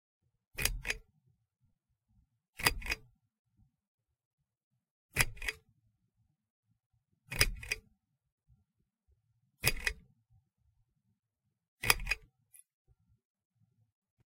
Bedroom Chain Lamp Switch
A bedroom sound effect. Part of my '101 Sound FX Collection'